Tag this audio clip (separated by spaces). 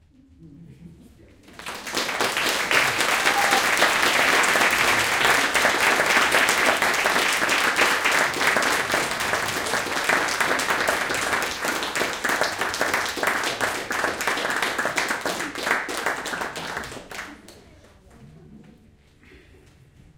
applaud applause ambience audience hand-clapping aplause